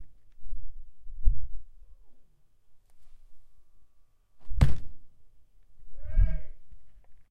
Floor Thud
Foot thudding against floor.
abstraction, FND112-ASHLIFIORINI-ABSTRACTION, syracuse